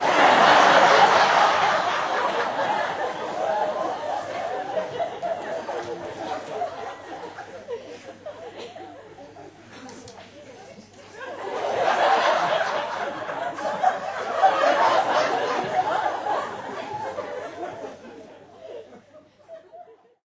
LaughLaugh in medium theatreRecorded with MD and Sony mic, above the people
czech, crowd, audience, prague, auditorium, theatre, laugh